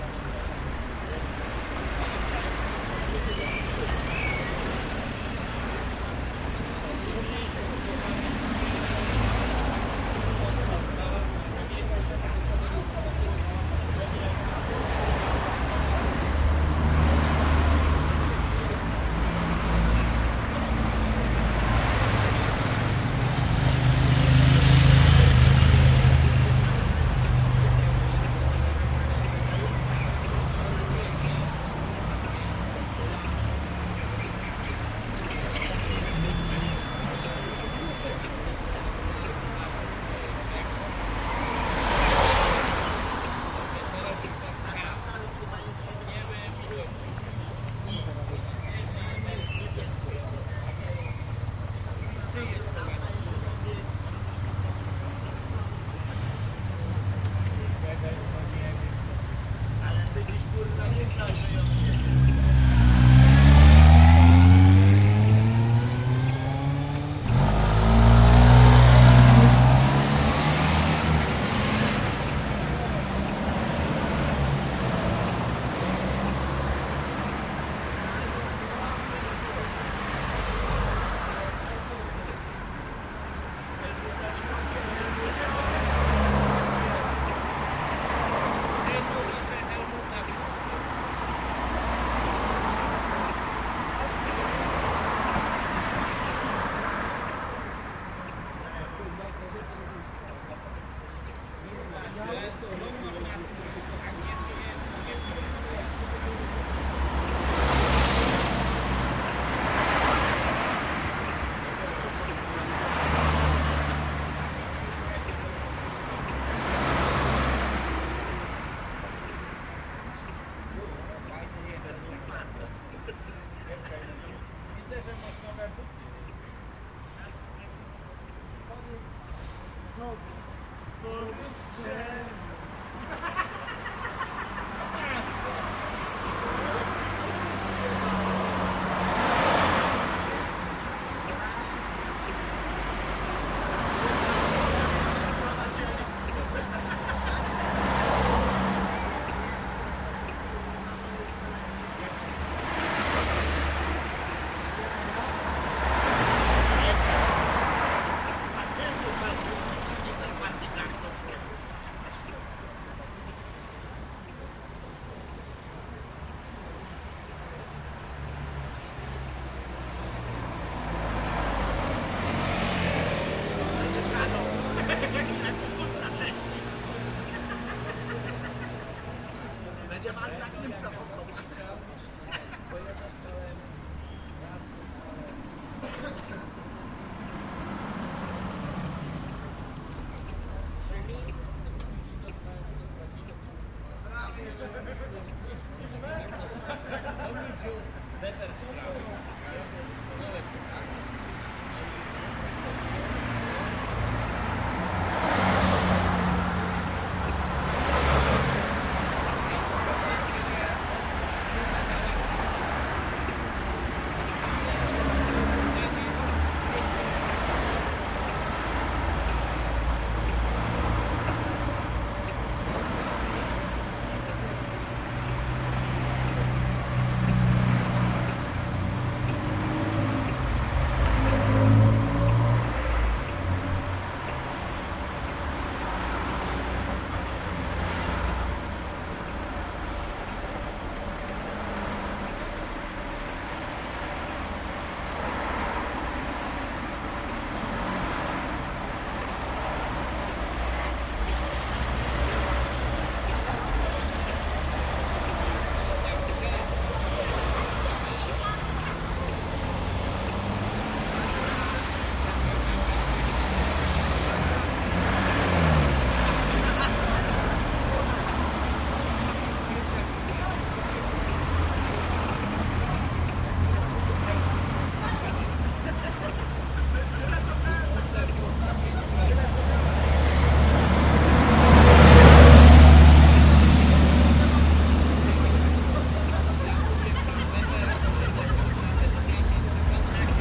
street-noises, recorded in June 2011 at the crossing of Felberstraße / Linzerstraße / Johnstraße